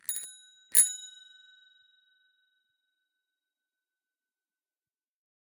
Bike bell 07
Bicycle bell recorded with an Oktava MK 012-01
bell, bicycle, bike, ring